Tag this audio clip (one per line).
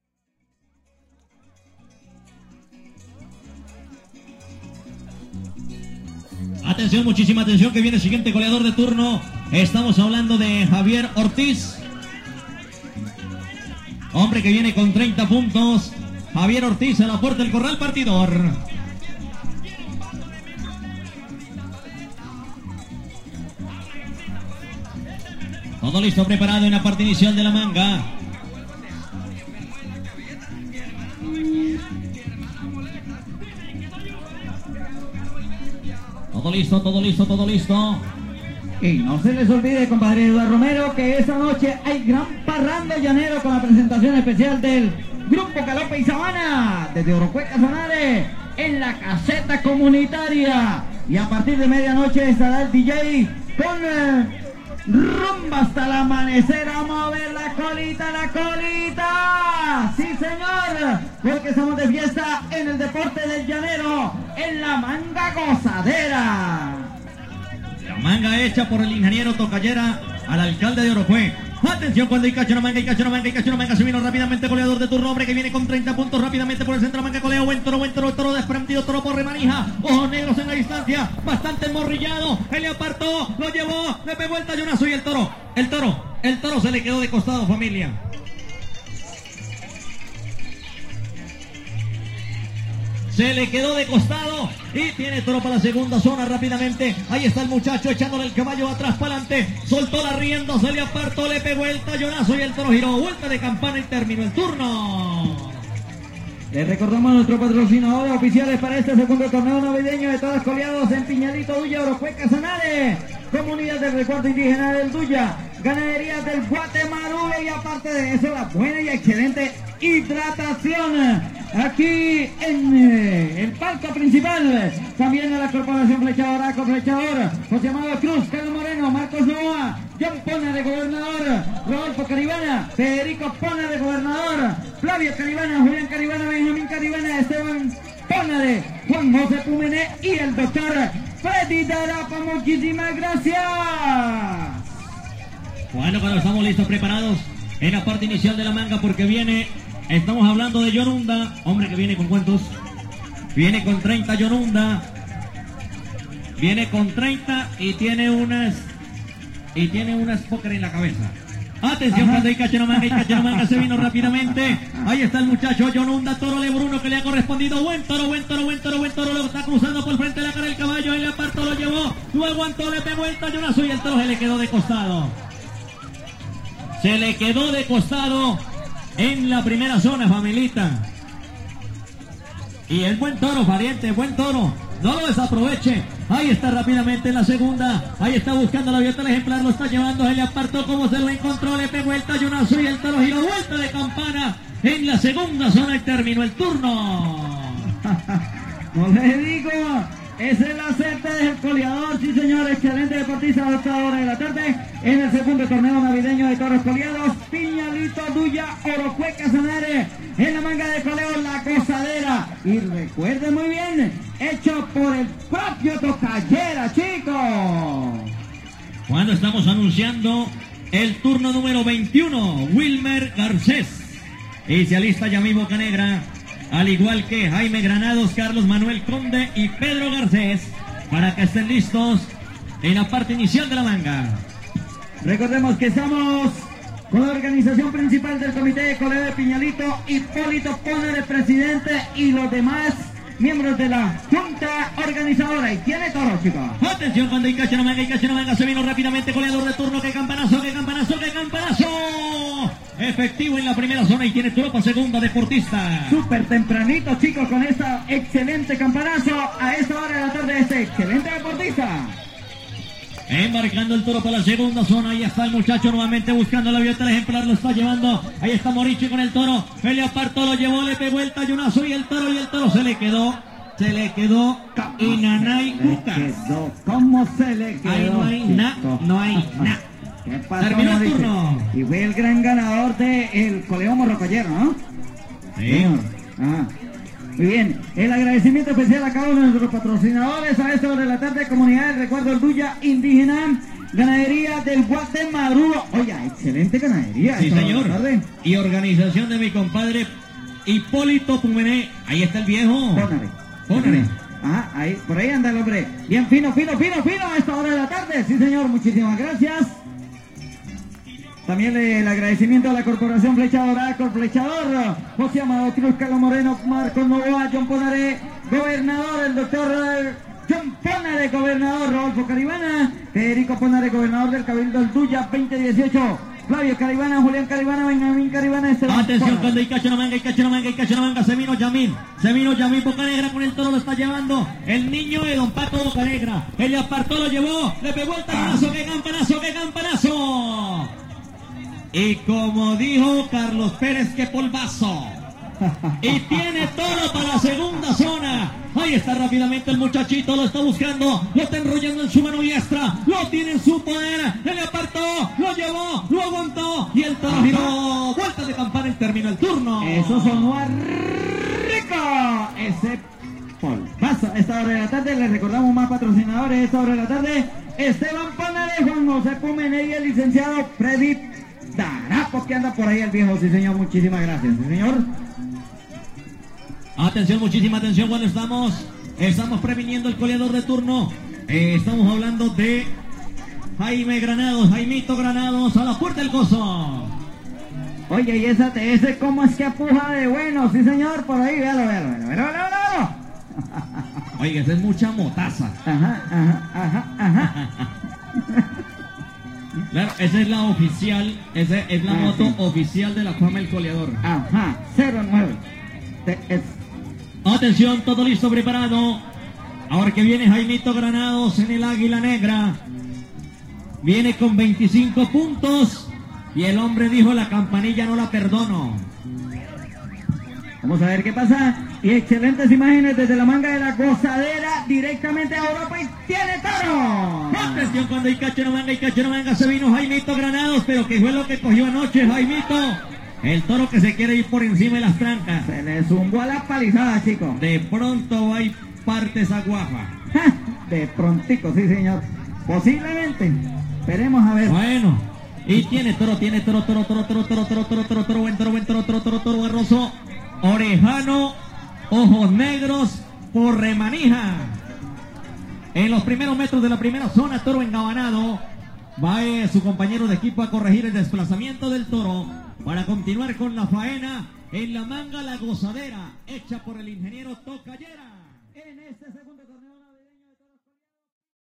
Coleo
Colombia
cowboy
rodeo